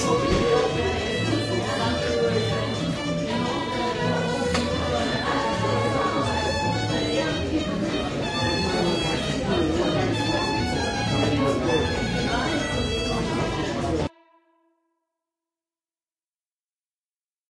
An ambient from a restaurant.